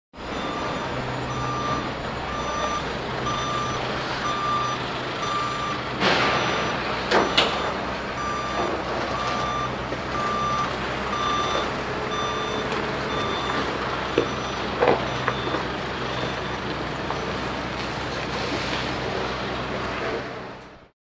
Forklift reverse beeping
Recorded on Marantz PMD661 with Rode NTG-2.
The beeping sound of a forklift moving in reverse with exterior building site ambience.
machine, industrial, site, field-recording, building, construction